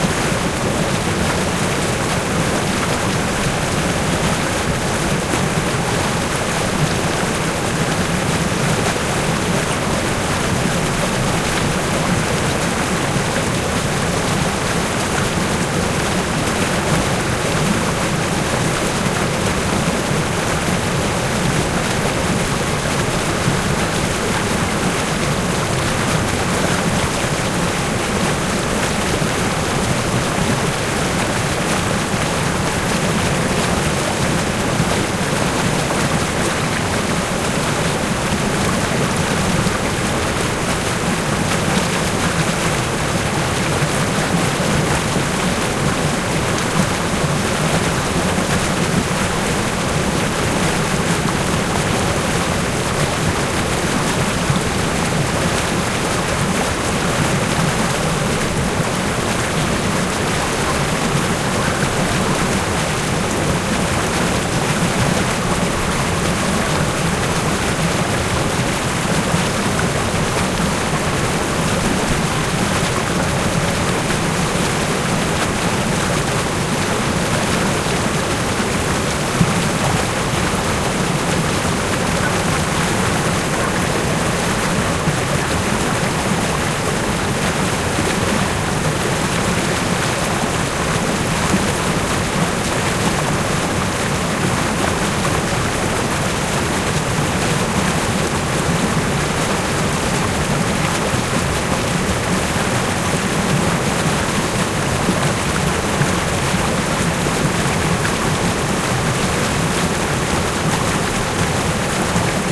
Spring run-off recorded March 11, 2013 using a Sony PCM M-10 recorder